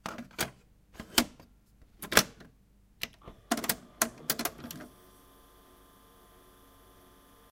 Tape Deck Startup
24, analog, analogue, bit, kassette, machine, mix, Sound-Effect, startup, stereo, tape, wave